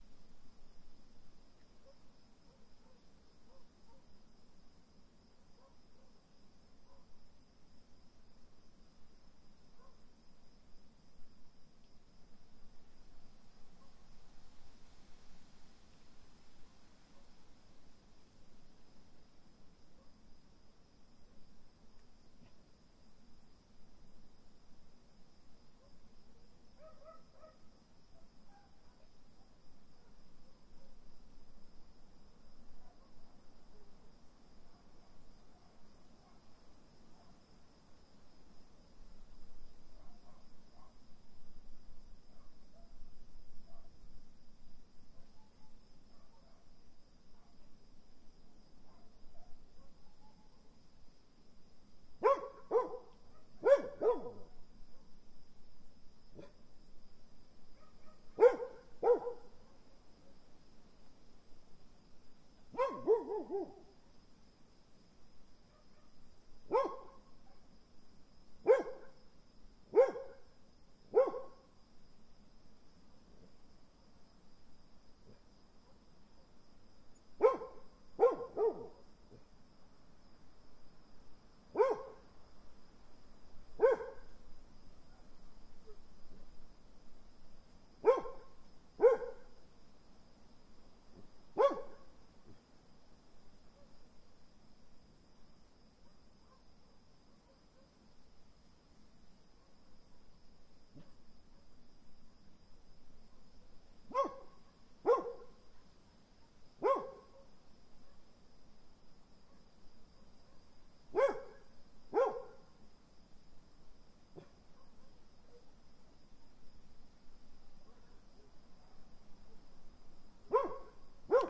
Country site
Country ambient recorded on Tascam DR_05
field-recording, Crickets, night, barking, insects